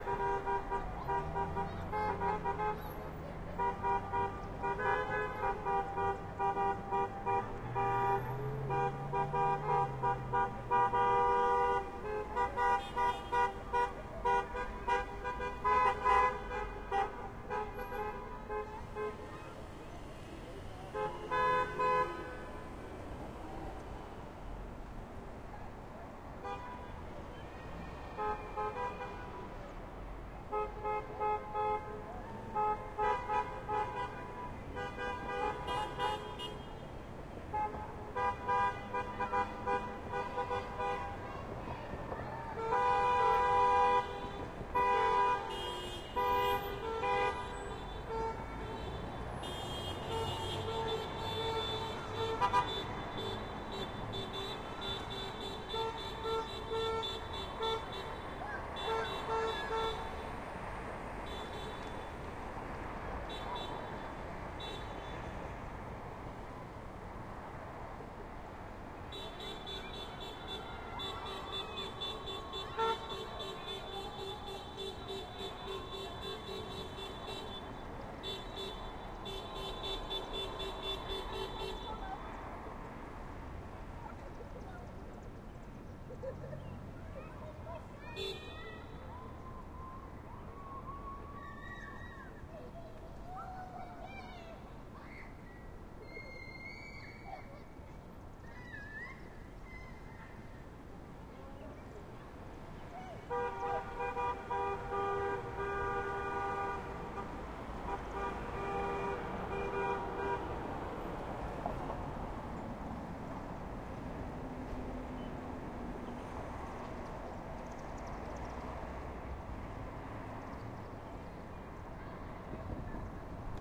People honking incessantly
sound, cars, honking, kids, people